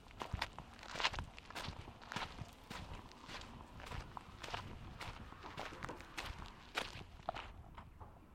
English Countryside (Suffolk) - Walking on a quiet path
Walking on a quiet path in the countryside. Audio recorded in Suffolk -- If you find this sound helpful, I'm happy to have a coffee bought for me ☕ (but you don't have too!)
♪♫ | RK - ☕ Buy me a coffee?
atmos, countryside, english-countryside, field-recording, footsteps, walking, walking-countryside, walking-quiet-road